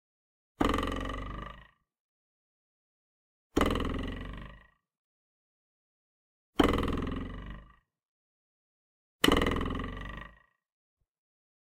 Cartoon Boing Sound created with a ruler. The 101 Sound FX Collection